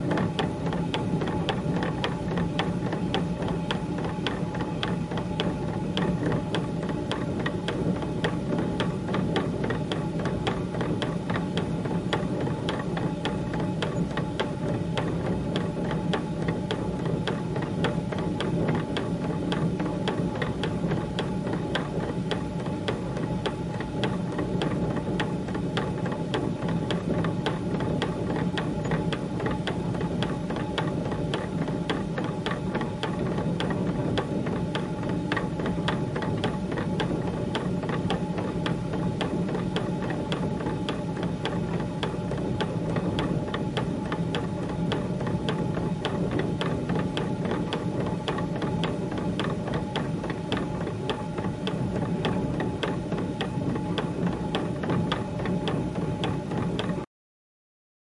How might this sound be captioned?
Water mill - the inside of a grind stone
These sounds come from a water mill in Golspie, Scotland. It's been built in 1863 and is still in use!
Here I put the recorder inside the millstone to catch the sound of the grinding.
historic, machinery, mechanical, water-mill